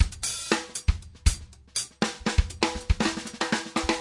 funk acoustic drum loops
drum, loops, acoustic, funk